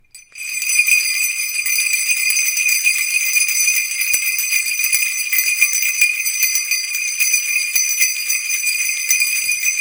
Jingle bells for Christmas